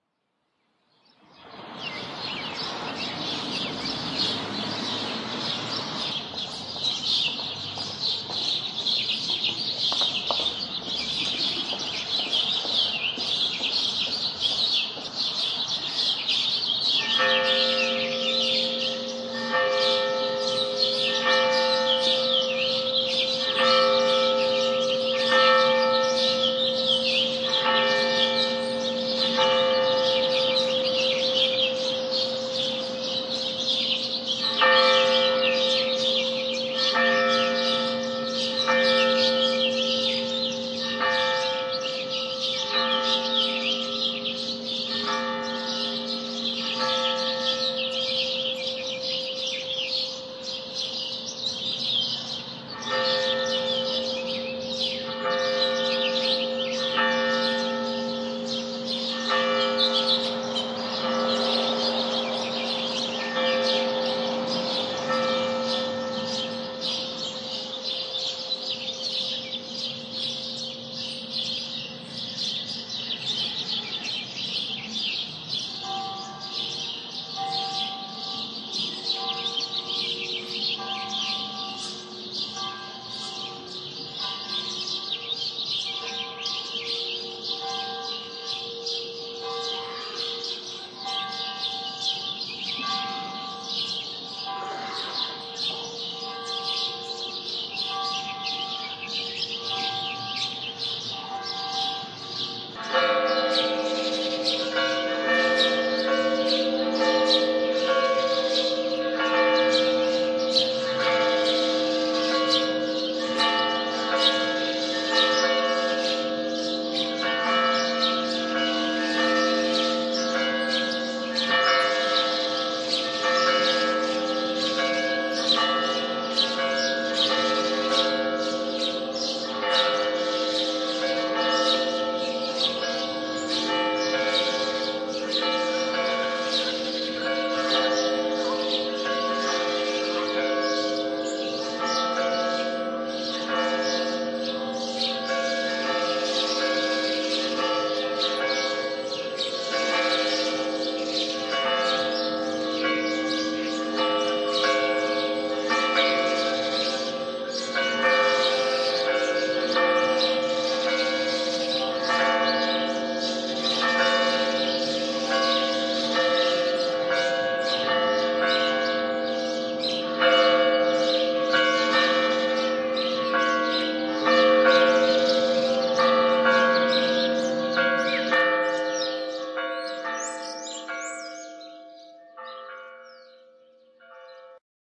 Easter Morning Birds & Bells
Stereo field-recording of the most exuberant birdsong and Easter morning bells I've ever heard. A single woman's heeled footsteps are heard at the beginning. A couple car passes. Removed a bit of the ambient urban roar with SoundSoap. Recorded with two Sennheiser 416's in XY, to Fostex PD-6.
field-recording, bells, spring, birdsong, church, church-bells, urban, birds, easter, stereo, ambient